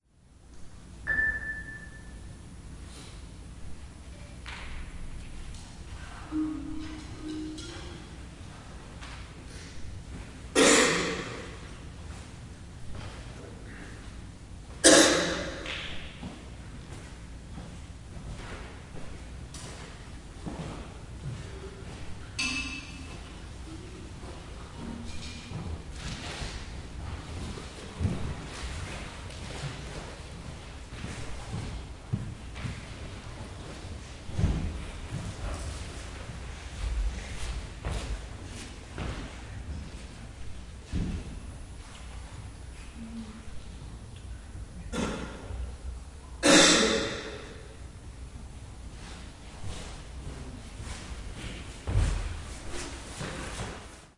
0253 Between rehearsal
Between rehearsals for a concert in Chugye University for the Arts. Marimba.
20120504
cough, field-recording, korea, seoul, steps